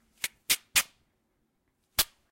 Tearing Cotton Cloth
cotton, cloth, tearing